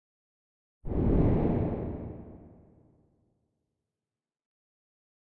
evil breath 3
Sound of a large creature breathing, atmospheric.